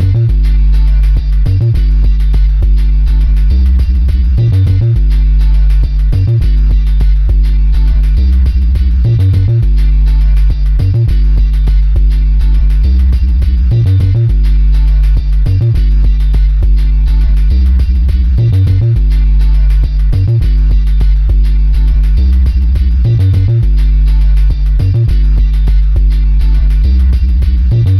super chill vibes rock loop, my guy
electricguitar, groovy, guitar, hats, loop, rock